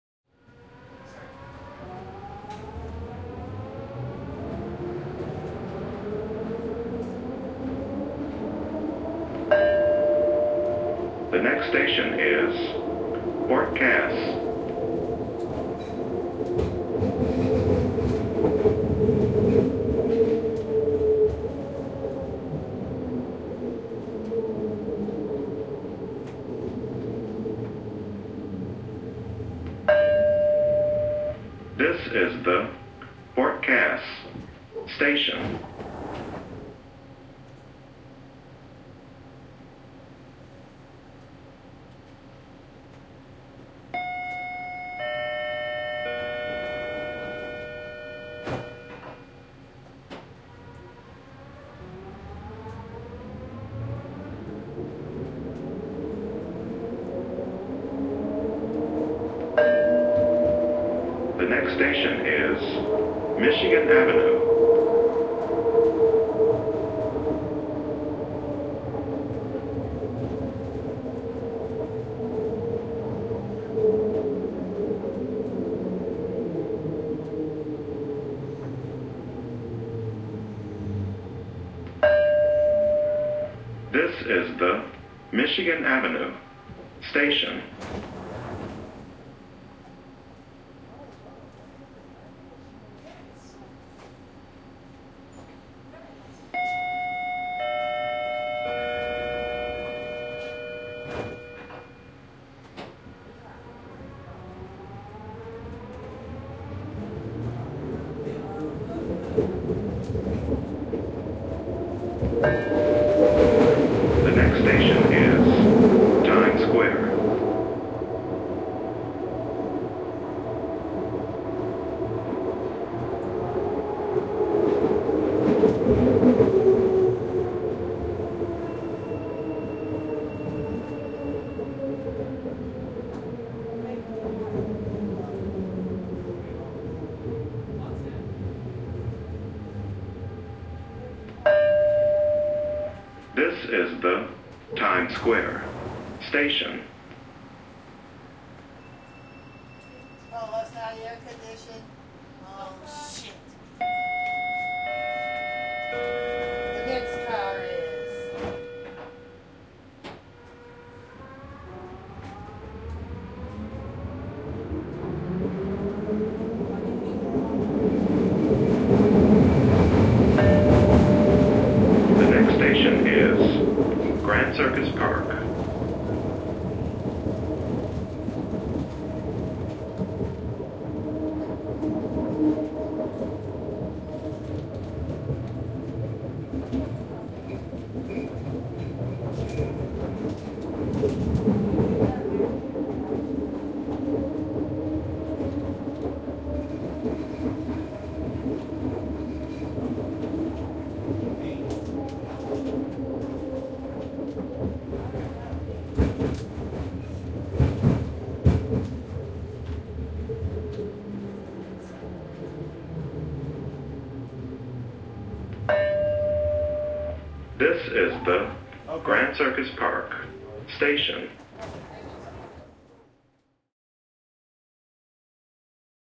Sound of the People Mover Detroit (no airco, not much people)